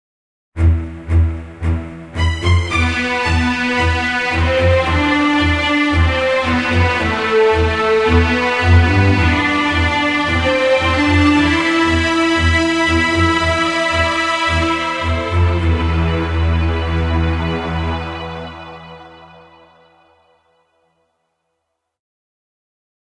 Kojiro Miura is one of my innovative characters .. He is a Rebel fighter hero who conducts many of training trips to look for the strongest to face him .. That is why he dedicated this musical track , which embodies his numerous and arduous trips in a darkest World.
Red Rebel